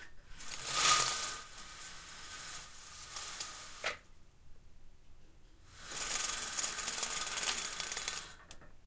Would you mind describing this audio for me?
Window Blinds
blinds, curtain, window